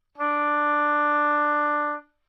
Part of the Good-sounds dataset of monophonic instrumental sounds.
instrument::oboe
note::D
octave::4
midi note::50
good-sounds-id::7962